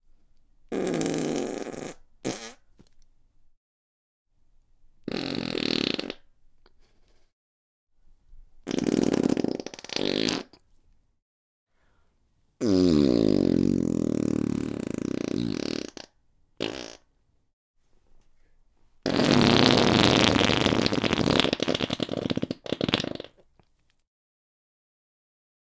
Farts, loud and obnoxious x5
Recorded on Zoom H4n.
5 comically loud and obnoxious fart noises suitable for scatological humour.
crap, shit, humor, poo, obnoxious, scatological, humour, poop, comedy, trump, loud, farts, funny, scat, fart